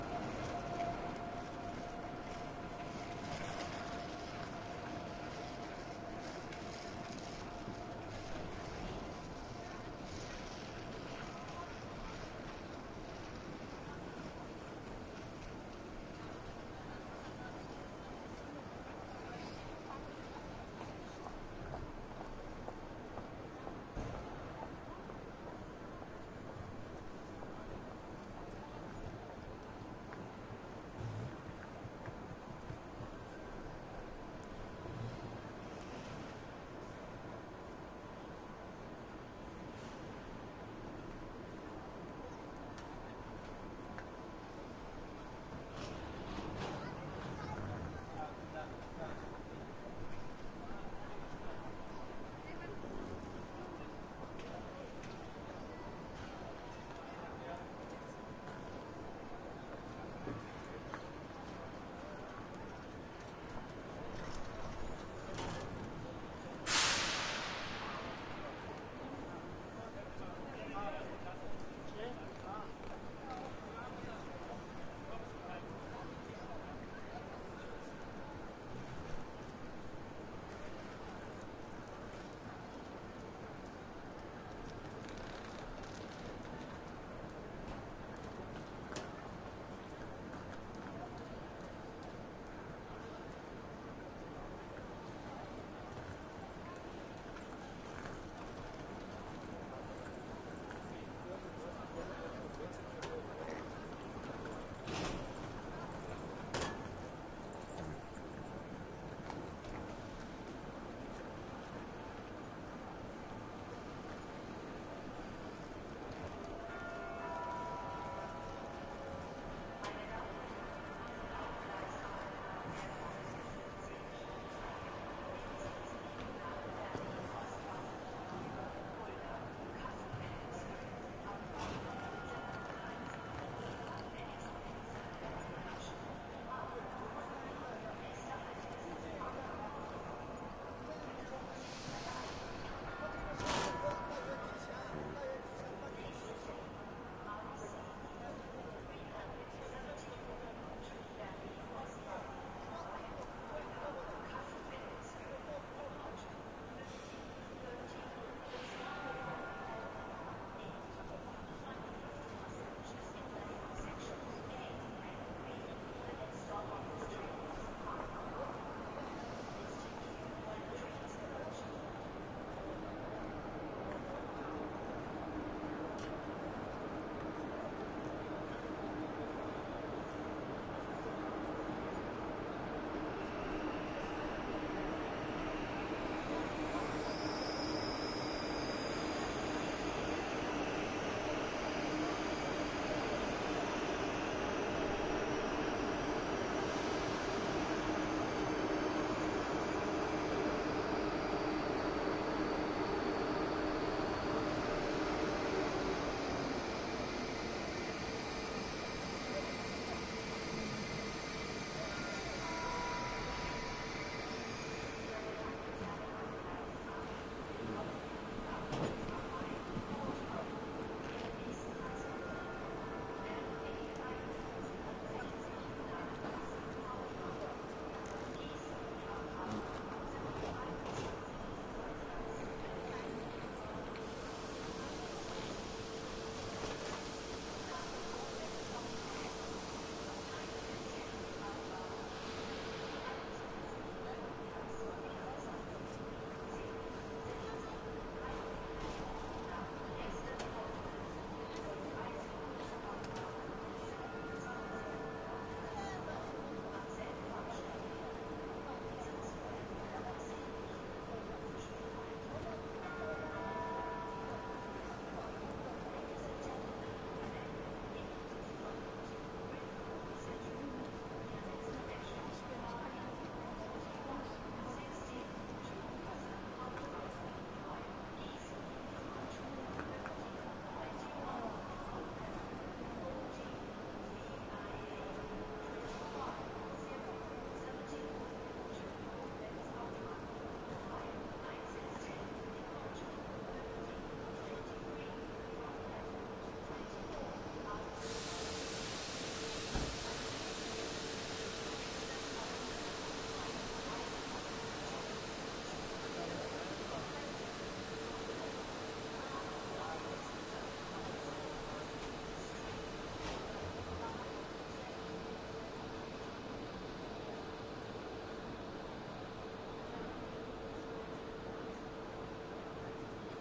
Recording in the main hall of Frankfurt station. Inside mics of a Sony PCM-D50.
field-recording, frankfurt, station, trainstation